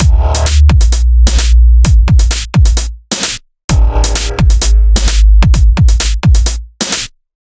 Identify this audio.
Loop A02 - Drums, Sub, Vox
Loop consisting of drums, sub, and vox effect. 130 bpm. Vox made with Sawer. Loop sequenced in FL Studio 11. Sub made with Subfreak.
synthesizer, snare, drum, electro, hats, sawer, vox, FL, trance, voice, dance, 11, kick, loop, dub, music, electronic, dubstep, bass, sub, sequence, sample, Studio